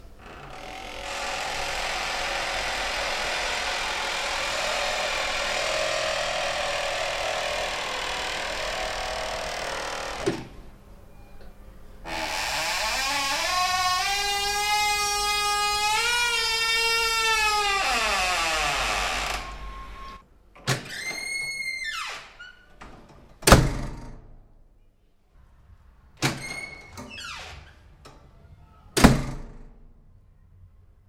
creaking wooden door moving very slowly weird noise foley
slowly, door, creaking, weird, wooden, noise, moving